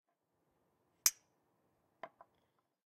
Tea Cups Clinking loud
Tea cups clinking together. Recorded with an H4N recorder in my dorm room.